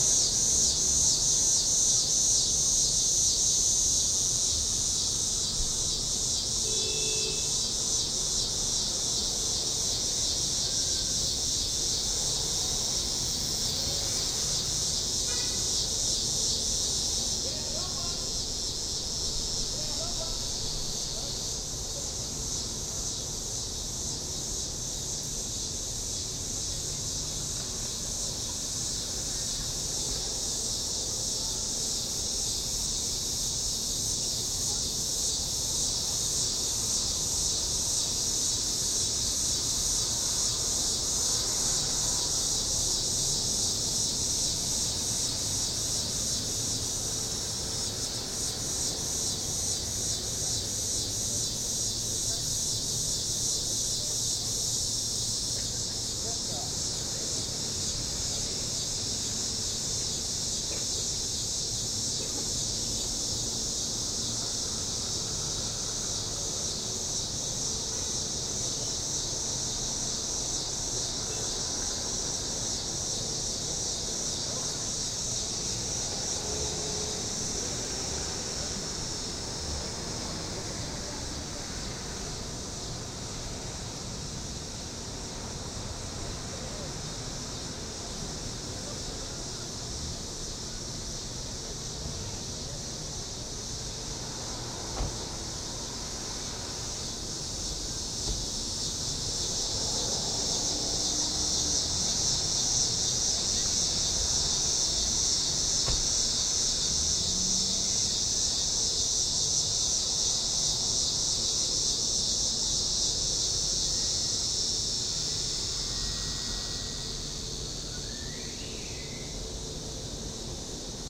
A suburban street, with some traffic in the background and a few voices, but the primary sound is the buzz of cicadas.